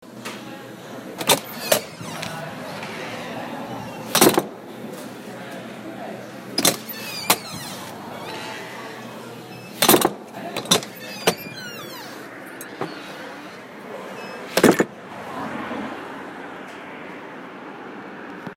heavy old door opening and closing in coffee shop
I'd hoped for the music to cut off before making this recording (and probably could have asked them to turn it off since I paid almost $4 for a cup of coffee (!)). Before I left, I opened and closed the door a few times while recording with an iPhone 6. (This was in the Mudhouse in Crozet, VA, in case you're curious.)
close, closing, coffee-shop, door, doors, handle, latch, old, open, opening, shut, slam, slamming, squeaky, wooden